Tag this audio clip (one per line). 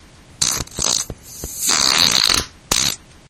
aliens
art
beat
car
computer
explosion
flatulation
flatulence
frog
frogs
gas
laser
nascar
noise
poot
race
ship
snore
space
weird